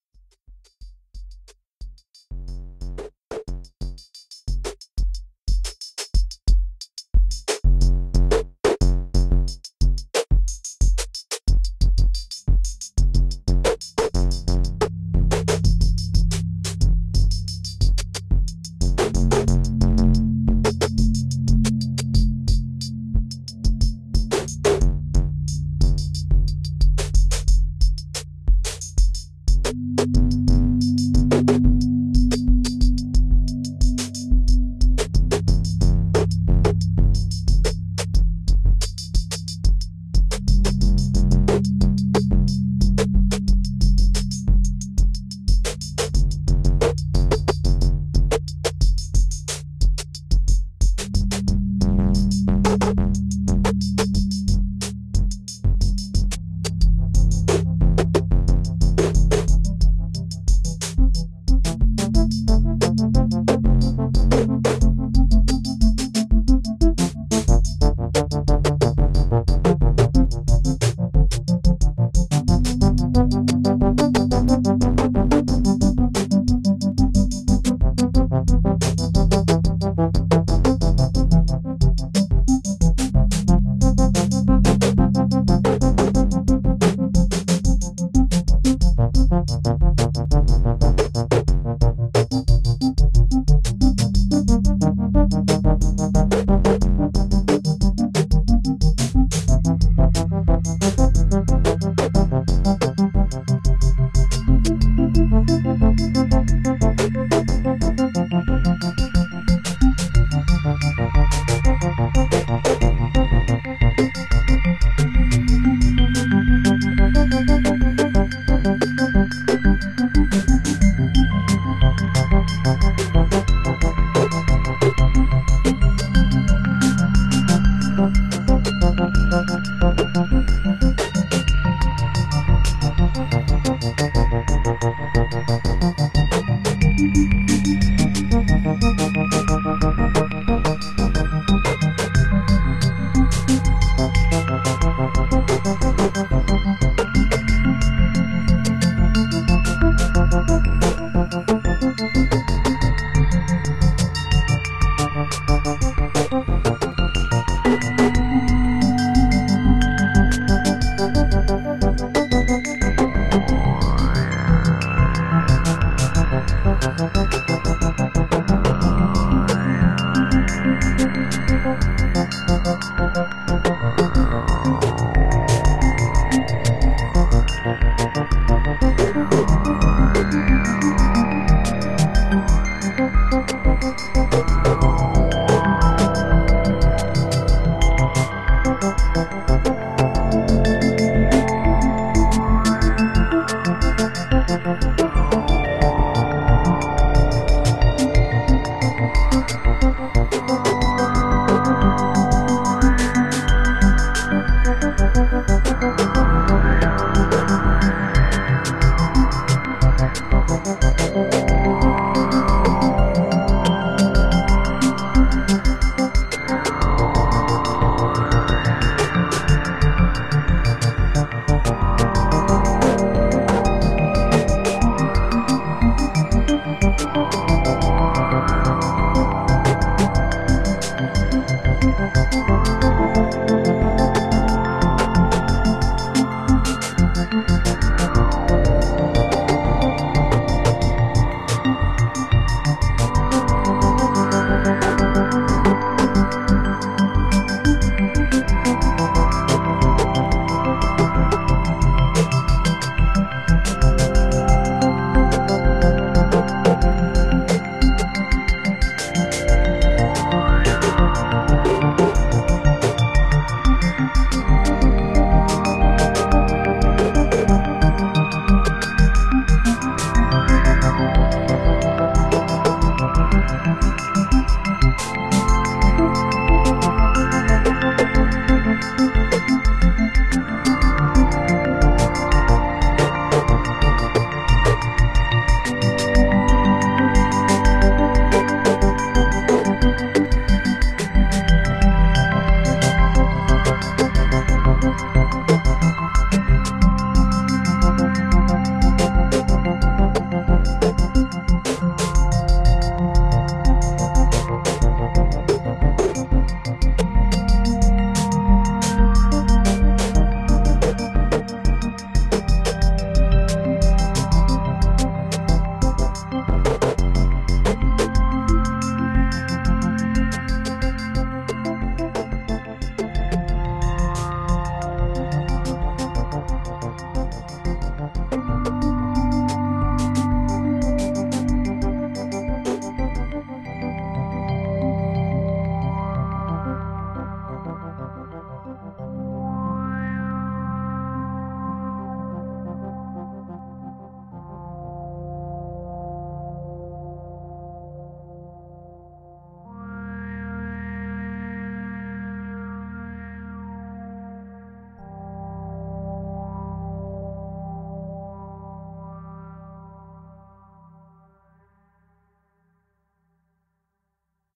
BCO - kirkkopuisto
An ambient, electronic atmosphere with warm chords and shimmering melodies.
ambient, dreamy, drone, electronic, evolving, experimental, pad, soundscape, space, synth